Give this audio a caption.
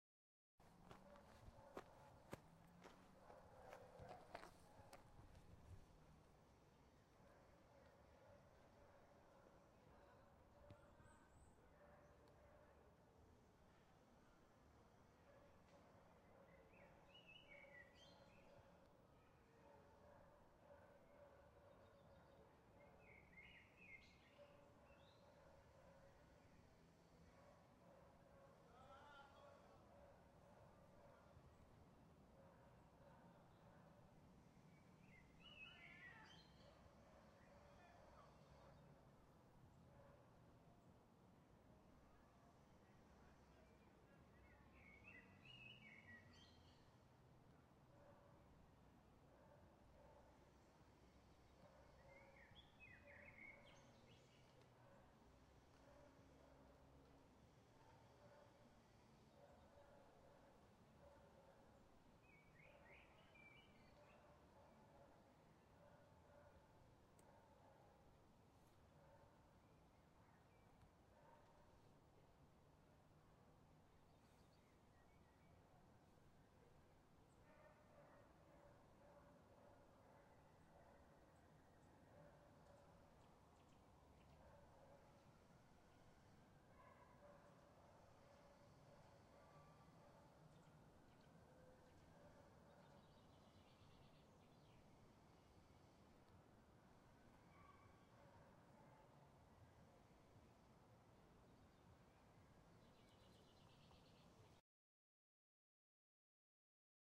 atmosphere - exteriour park 2
Pleasure ground in centre of Prague: birds, dogs, trees...
exterior, park, pleasureground